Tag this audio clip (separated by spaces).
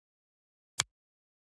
fingers crunch brittle snaps snap hand percussion finger pop fingersnap click tap natural bone crack snapping hands